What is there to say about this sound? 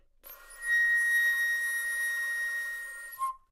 overall quality of single note - flute - A#6
Part of the Good-sounds dataset of monophonic instrumental sounds.
instrument::flute
note::Asharp
octave::5
midi note::70
good-sounds-id::150
dynamic_level::p
Asharp5, flute, single-note, neumann-U87, multisample, good-sounds